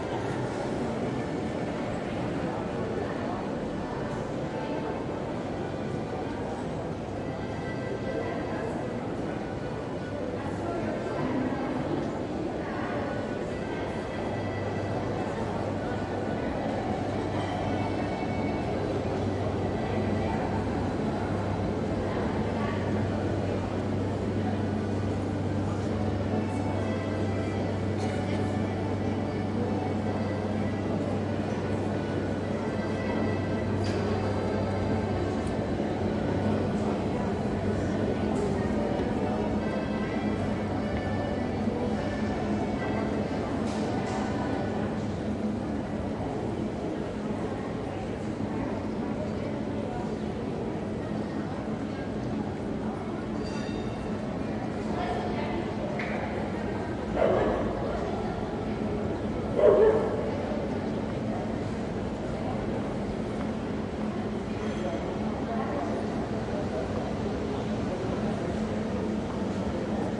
4ch surround atmo recording of the Hauptplatz (Main Square) in Graz/Austria. It is a warm summer evening, lots of people are shopping and going about their business. An accordion player can be heard in the midfield, as well as trams from the nearby stop. The recorder is situated in front of the City Hall, facing outward toward the square. People can be heard walking and talking in the arcades of the City Hall in the rear, a dog on the square starts barking toward the end of the recording.
Recorded with a Zoom H2
These are the REAR channels of a 4ch surround recording, mics set to 120° dispersion.